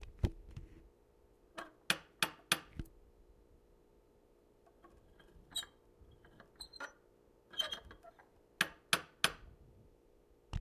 Lightly tapping door knocker on outside of apartment door.